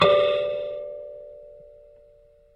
96kElectricKalimba - O2harmonic
Tones from a small electric kalimba (thumb-piano) played with healthy distortion through a miniature amplifier.